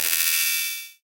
tik delay 04
part of drumkit, based on sine & noise
drums, noise, sine